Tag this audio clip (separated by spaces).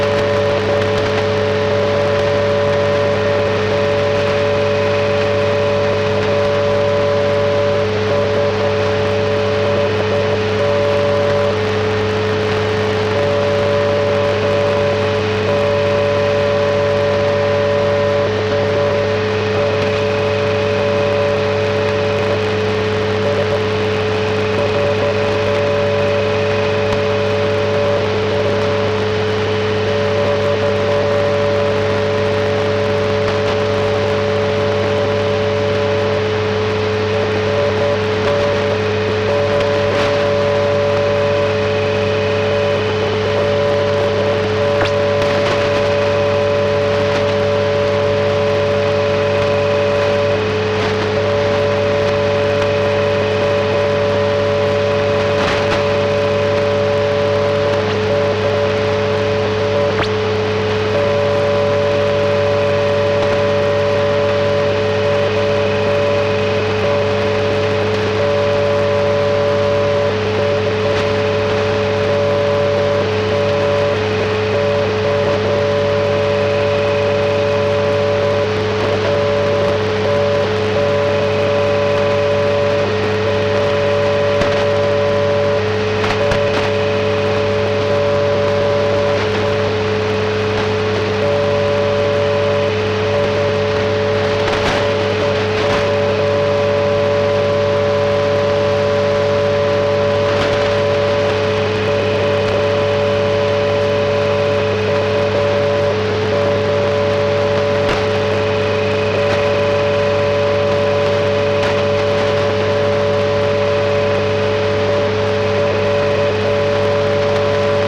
electronic interference radio shortwave noise